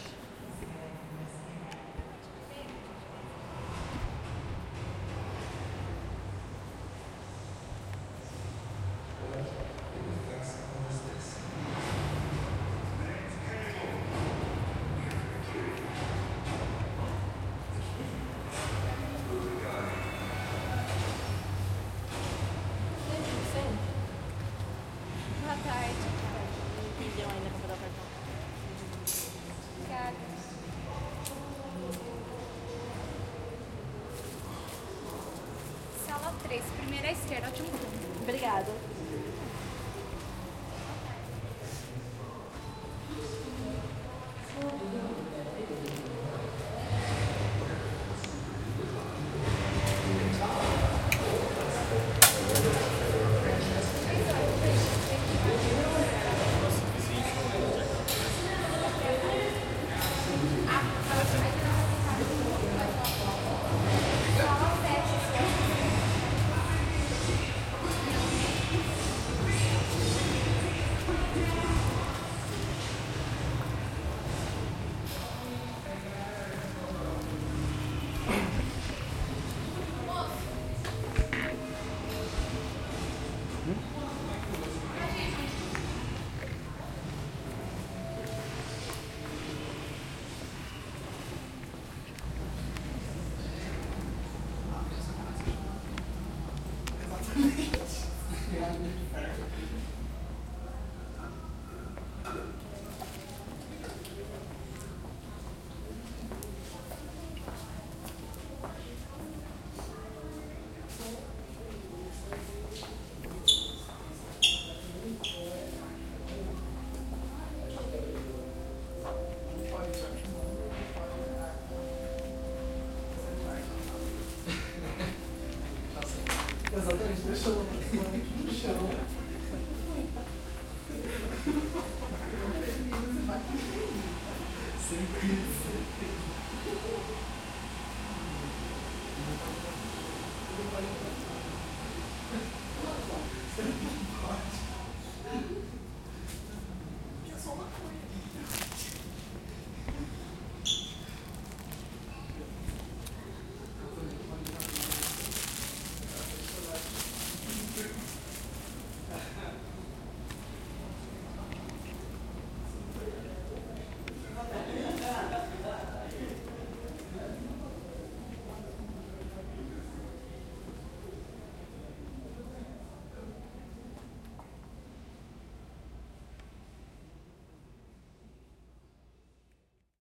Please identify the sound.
cinema corredor
Áudio do som ambiente de um corredor de acesso a sala de cinema, gravado pelo microfone "Tascam dr-40" para a disciplina de Captação e Edição de Áudio do curso Rádio, TV e Internet, Universidade Anhembi Morumbi. São Paulo-SP. Brasil.
sound, ambient, movie-theater, effect, ambience, soundscape